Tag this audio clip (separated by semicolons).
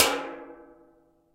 percussion; atonal; metal